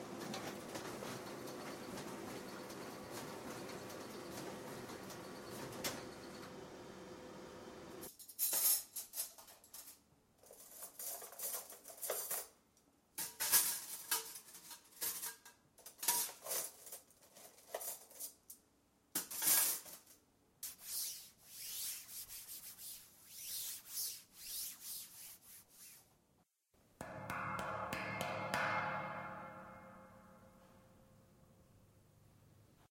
tcr sound scape hcfr maelle lc emie donia
France, Soundscapes, Pac